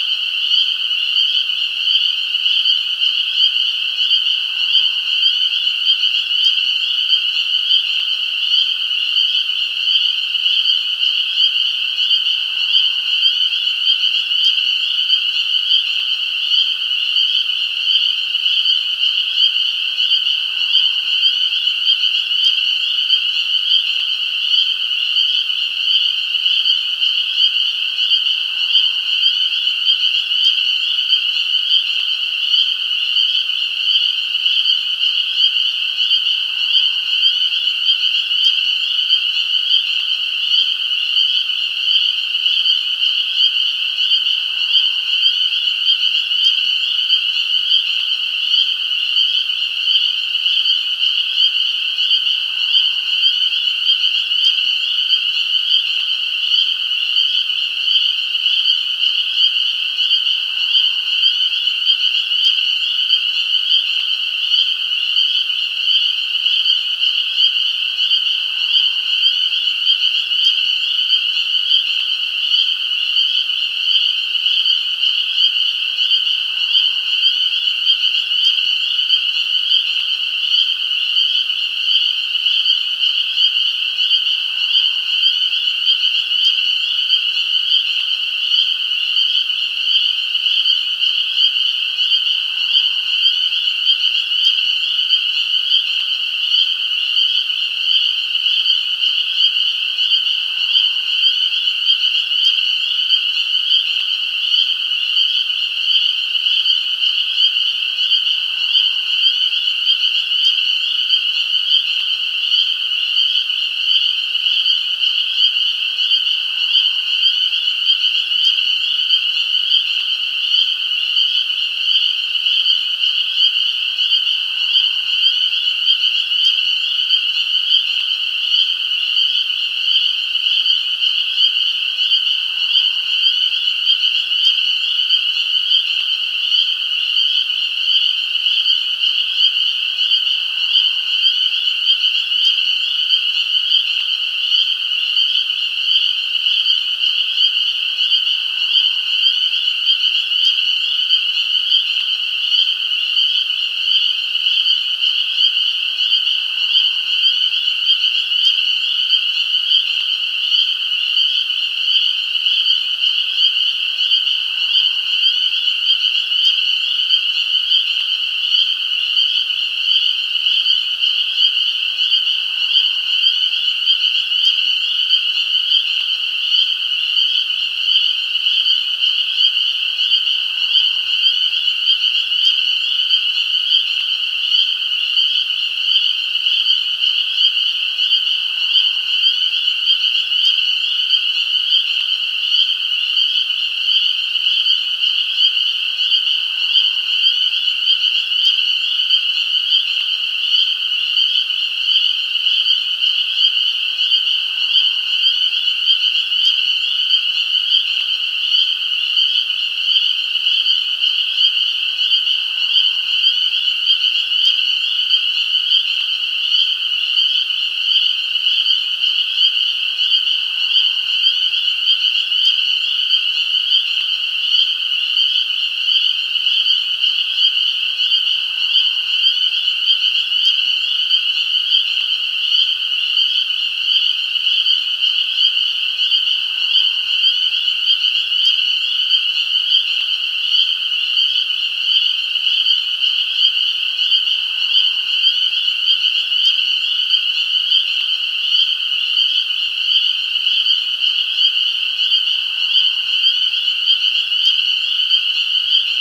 Frogs and other sounds of spring at a creek in Pisgah National Forest at Bent Creek NC beside Lake Powhatan at the bridge, This file was recorded with a Fujifilm XT120 camera. I hope you can find a use for it.
For the love of music
Frogs, spring